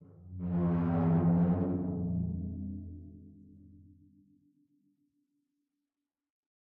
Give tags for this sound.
dark
flickr
low
reverb
superball
timpani
unprocessed

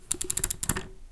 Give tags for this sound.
washing-machine
typical-home-sounds
rotating-selector

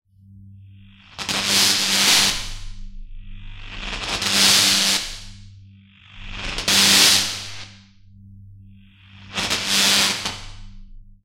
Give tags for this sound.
hum
alesis
sparking
synthesizer
zap
micron
electricity

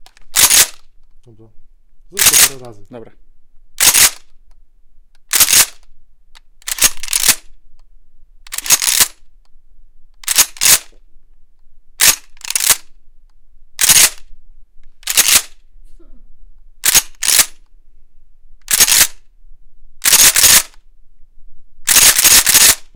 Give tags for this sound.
gun
reload
shotgun
weapon

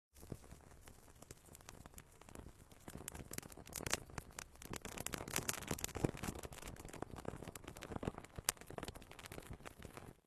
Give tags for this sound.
burn
burning
fire

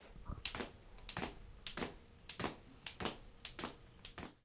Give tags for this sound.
rope
hard
jumping
floor

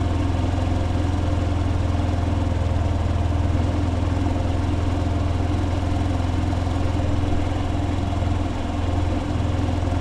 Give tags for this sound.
Zuzuki
RPM
Engine
Emulator
Moto
Motorbike-engine
motorcycle
Velocity
Transportation

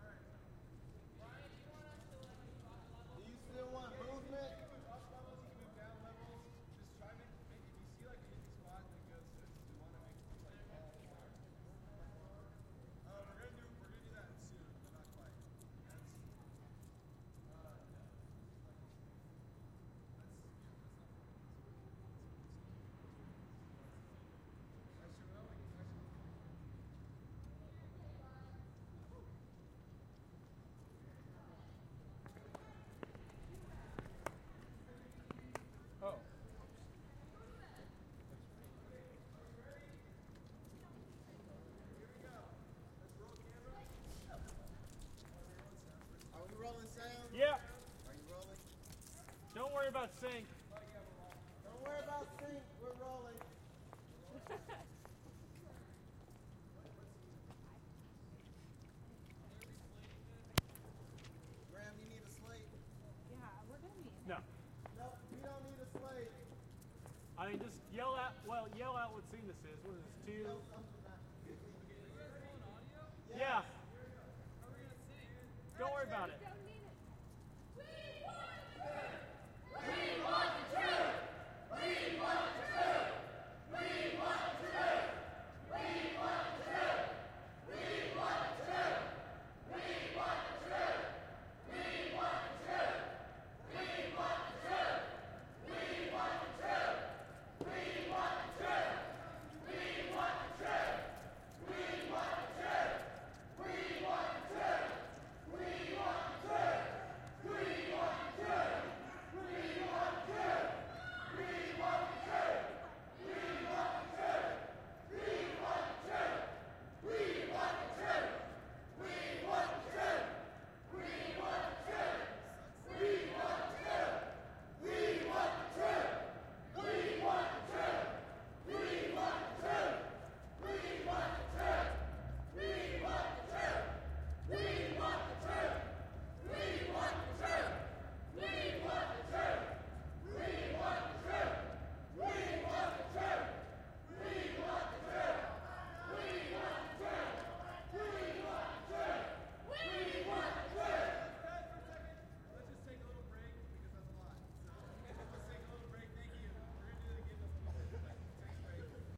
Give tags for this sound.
1
5
Crowd
Holophone
Protest
Riot

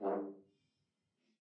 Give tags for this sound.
brass
f-horn
fsharp2
midi-note-43
midi-velocity-31
multisample
single-note
staccato
vsco-2

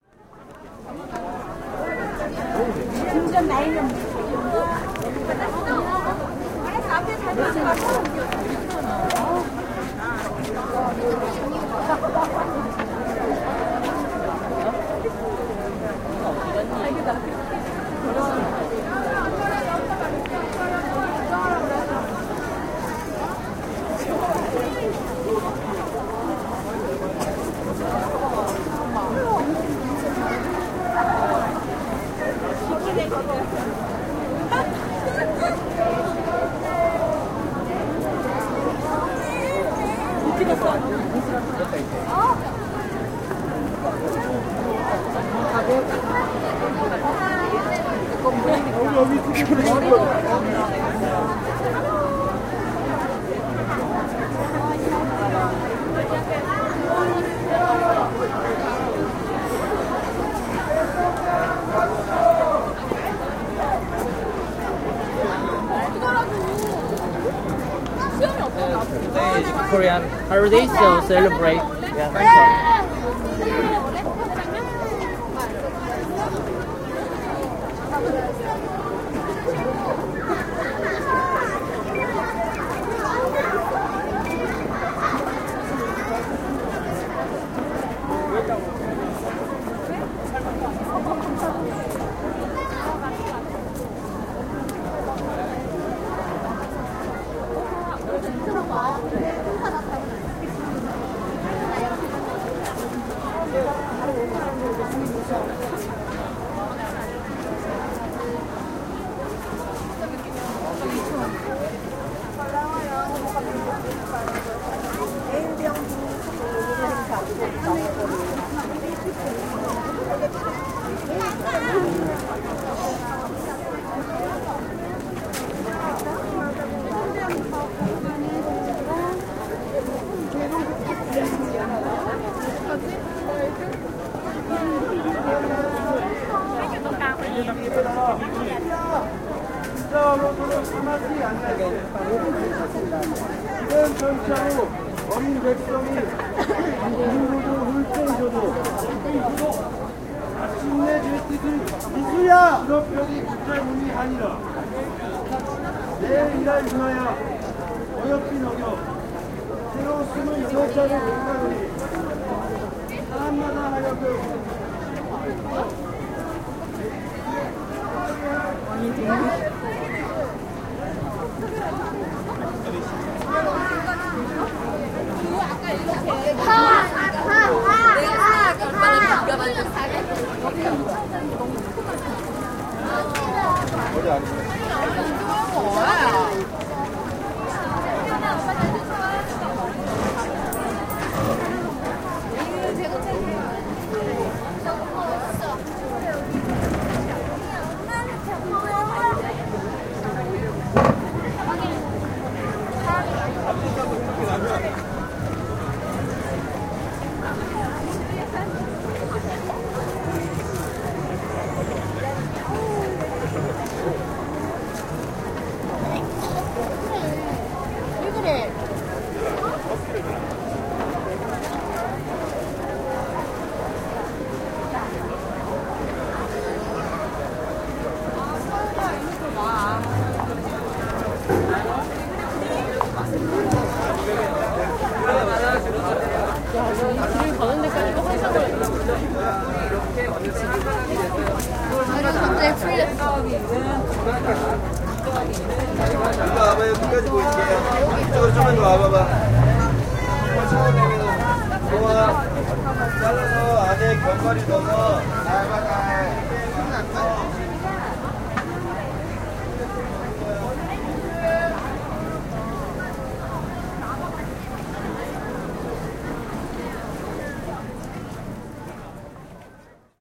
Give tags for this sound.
city
field-recording
Korea
Korean
street
talking
voices

